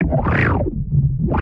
layered granular 06
Foley samples I recorded and then resampled in Camel Audio's Alchemy using additive and granular synthesis + further processing in Ableton Live & some external plugins.